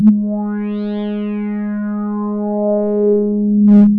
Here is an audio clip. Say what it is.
1 of 23 multisamples created with Subsynth. 2 full octaves of usable notes including sharps and flats. 1st note is C3 and last note is C5.